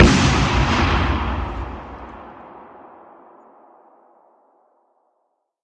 USAT BOMB
Recording of an actual explosion in the Vale Of Belvoir, Leicestershire UK, in about 1988. There was a thick fog at the time which added a very pleasing natural reverb effect. The explosive device was a small steel cylinder filled with black powder and placed under a fallen tree. This source was captured using a Phillips cassette recorder and digitised some years later.
ordnance, military, stereo, exploding, war, explosive, cannon, sound-effects, artillery, echo, explosion, grenade, huge, bang, sound-effect, field-recording, rumble, fog, belvoir, leicestershire, cinematic, large, exploded, boom, big